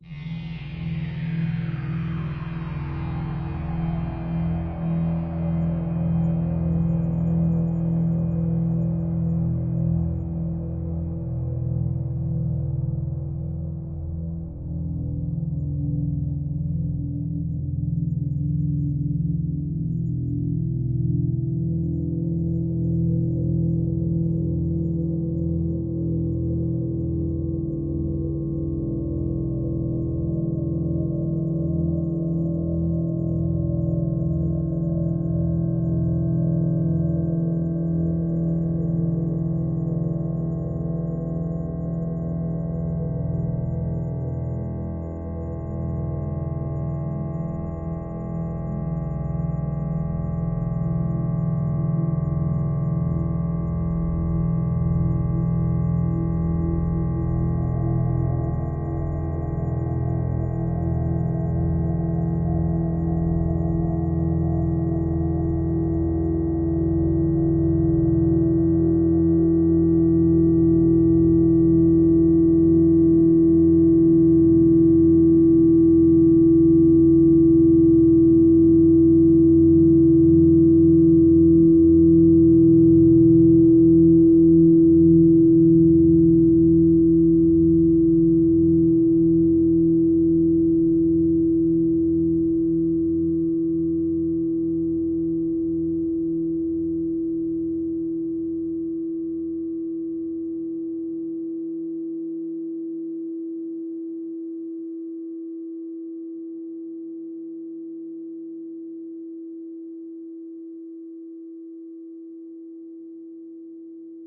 LAYERS 016 - METALLIC DOOM OVERTUNES is an extensive multisample package containing 128 samples. The numbers are equivalent to chromatic key assignment covering a complete MIDI keyboard (128 keys). The sound of METALLIC DOOM OVERTUNES is one of a overtone drone. Each sample is more than one minute long and is very useful as a nice PAD sound with some sonic movement. All samples have a very long sustain phase so no looping is necessary in your favourite sampler. It was created layering various VST instruments: Ironhead-Bash, Sontarium, Vember Audio's Surge, Waldorf A1 plus some convolution (Voxengo's Pristine Space is my favourite).